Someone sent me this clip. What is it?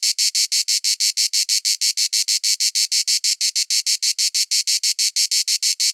Animal Cicada Solo Loop
Sound of a single cicada (Close Recording // Loop).
Gears: Zoom H5
animal, nature